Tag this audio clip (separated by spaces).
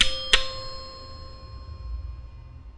baoding double percussion chinese balls short